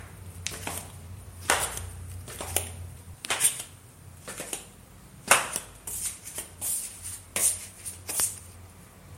down stairs with slippers
Downstairs with slippers.
Recording by MIUI HM 1W.